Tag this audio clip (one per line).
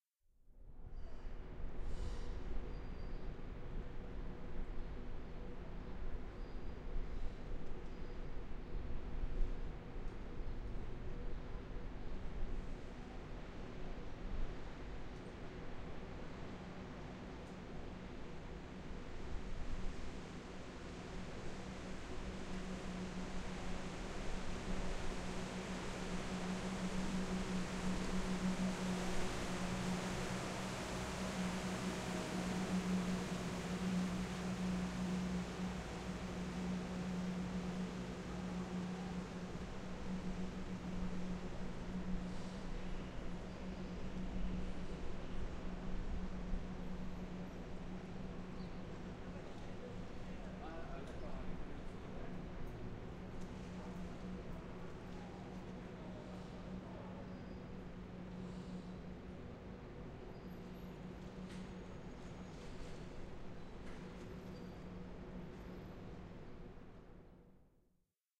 field
recording